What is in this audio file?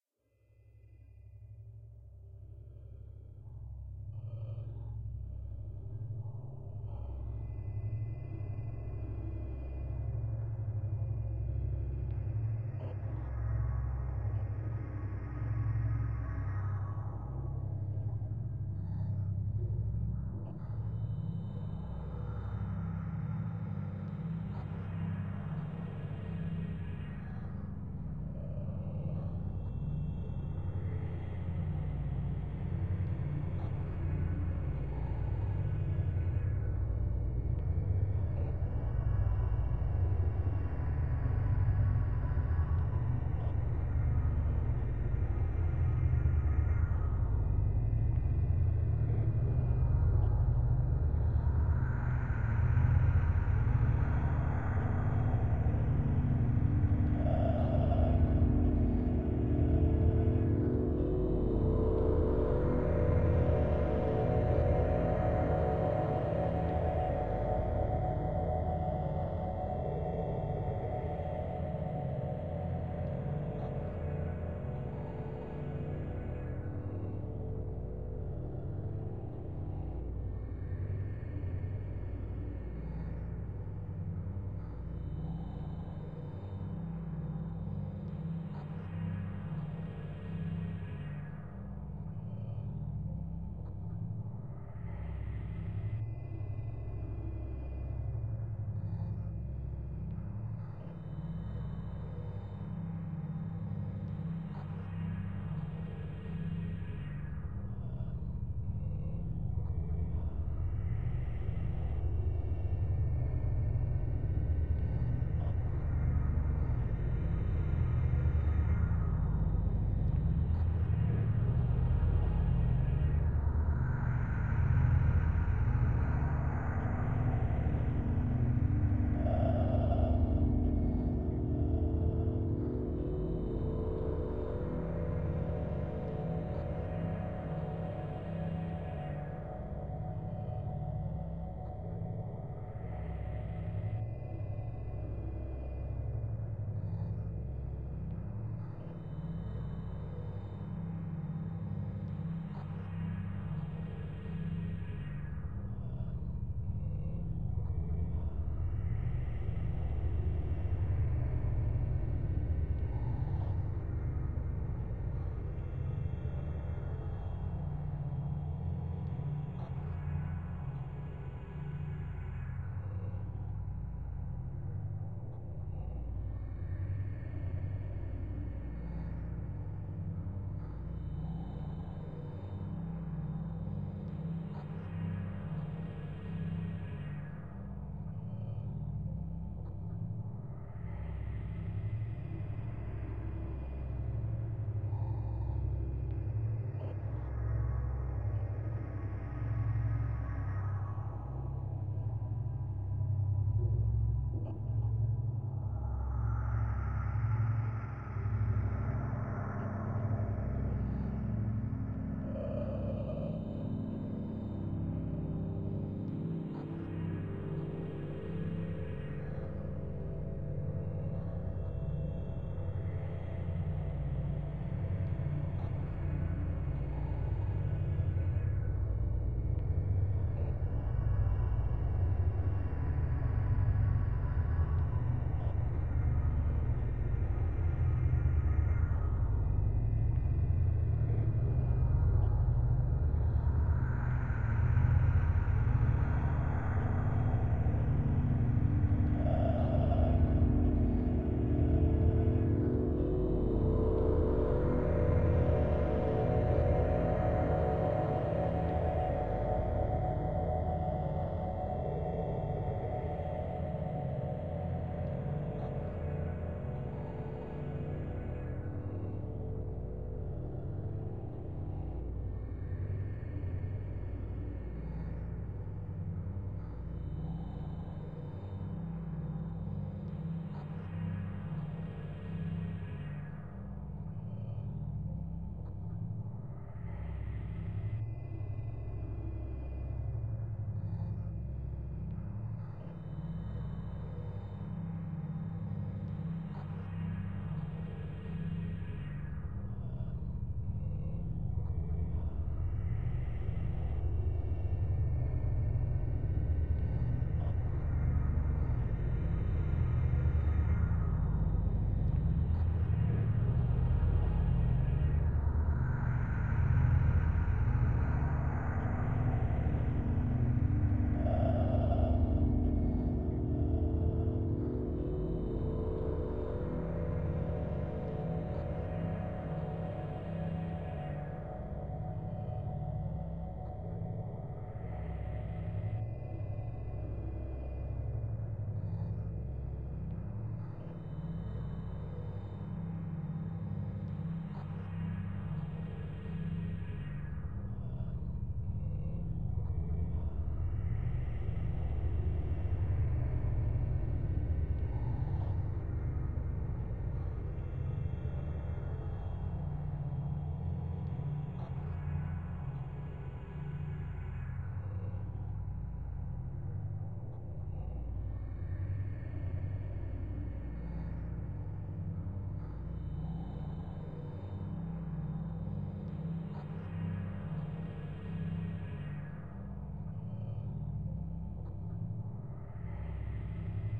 Ambient Space Noise 1 (30bpm 6m 24s)
Loopable, ambient noise, 6 mins 24 seconds long. Recorded at 30bpm in Ableton Live 10.
industrial, space, ambient